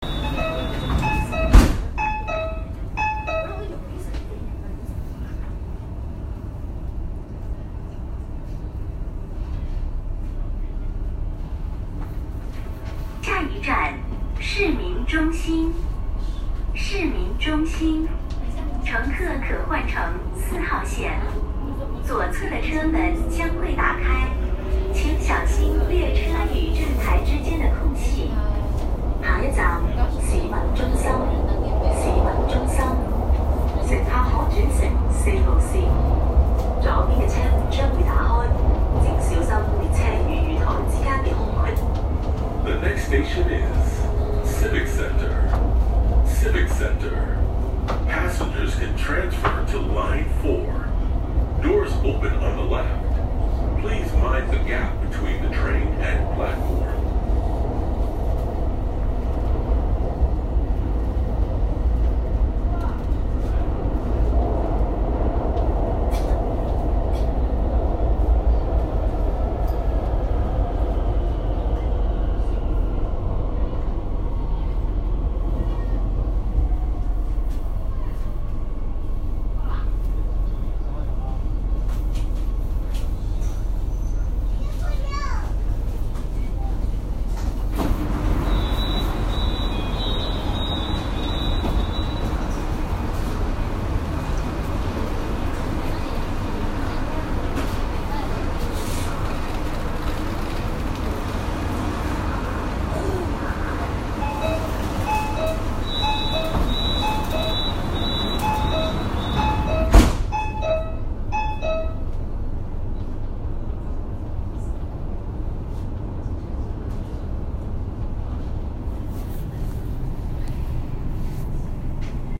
Guangdong/Shenzhen Subway
This was recorded inside a subway car when I was taking a ride in Shenzhen, China. This was recorded on 2/1/2018.
China, City, Shenzhen, Subway, Transportation, Travel